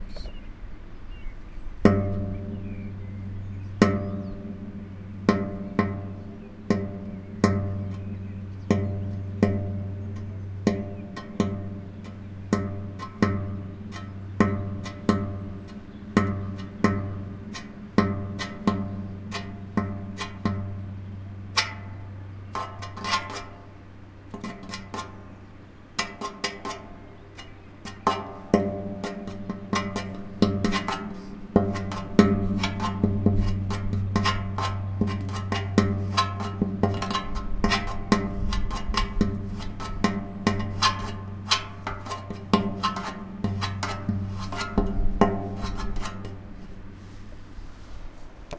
05 metal kicks fast
me kicking in a huge metal structure up in a mountain + distant birds and ambiance.